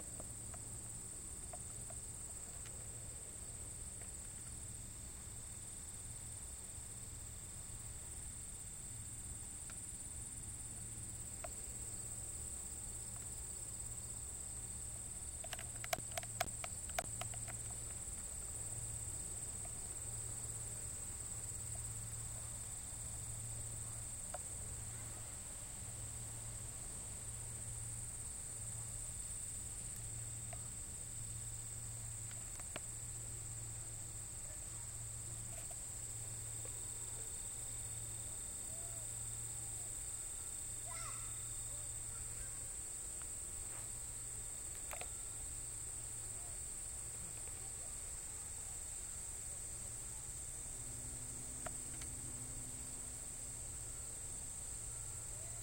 night crekets
I was in a forest and I recorded crekets
crekets, field-recording, summer, nature, crickets, insects, cricket